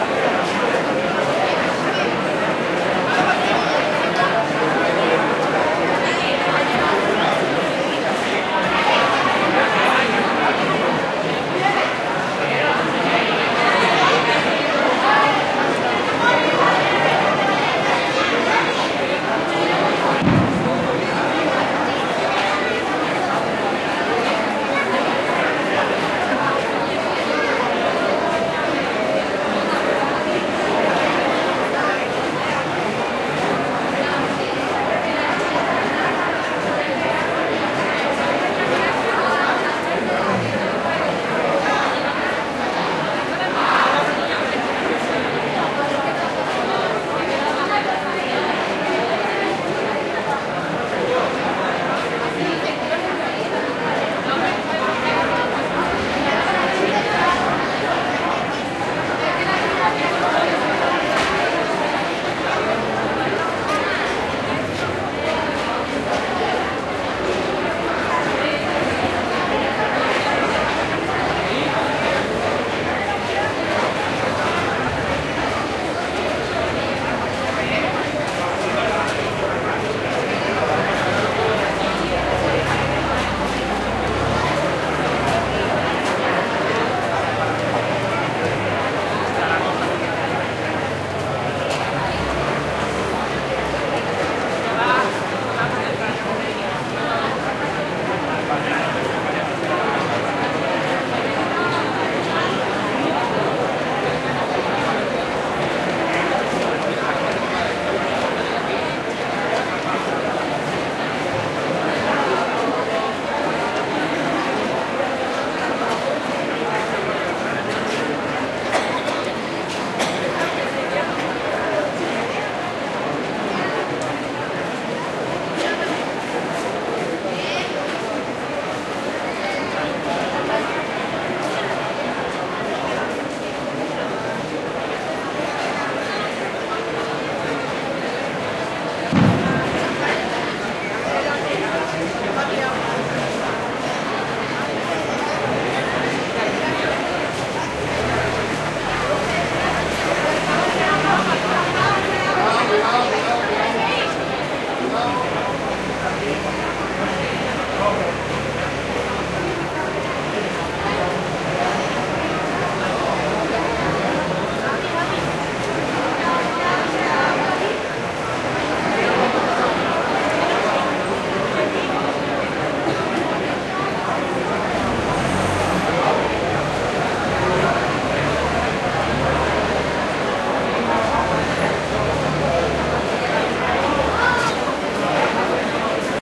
Crowd noise during the traditional Jan 5th parade in Seville, Spain.
ambiance, children, city, crowd, field-recording, parade